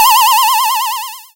Magic Spell 02
A spell has been cast!
This sound can for example be used in role-playing games, for example when the player plays as Necromancer and casts a spell upon an enemy - you name it!
If you enjoyed the sound, please STAR, COMMENT, SPREAD THE WORD!🗣 It really helps!
angel, bright, dark, fantasy, game, mage, magic, necromancer, rpg, shaman, sorcerer, spell, wizard